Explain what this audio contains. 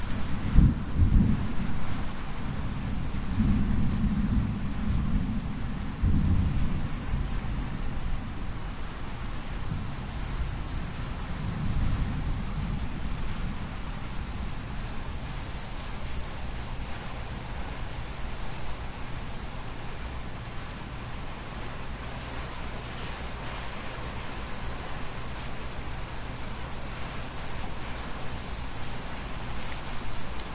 The beginnings of a tropical storm recorded from the back door.
storm, lightning, raining, record, winds, ambiance, wind, tropical-storm, field-recording